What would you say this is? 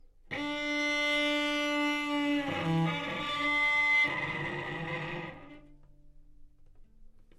Part of the Good-sounds dataset of monophonic instrumental sounds.
instrument::cello
note::D
octave::4
midi note::50
good-sounds-id::4529
Intentionally played as an example of bad-richness